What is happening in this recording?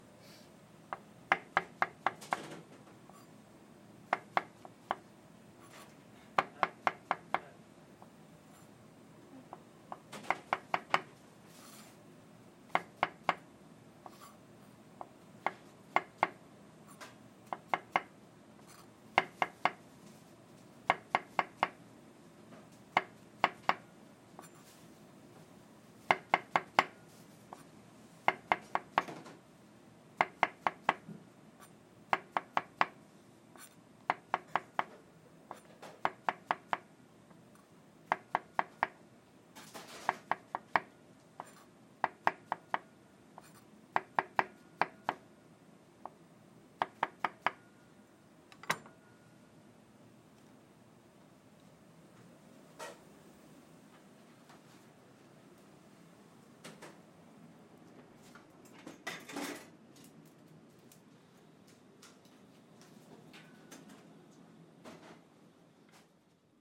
Recording of a chef cutting mushroom with a knife on a wood board in a restaurant's kitchen during prepping time. Some kitchen background noise (extractor, silverware, voices...)
recording chain -> Oktava mc012 -> ad261 -> Zoom h4
board, restaurant, wood, prepping, knife, mushroom, kitchen